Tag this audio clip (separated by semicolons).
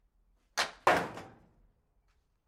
slap; bang; metal; impact; shot; hit; hockey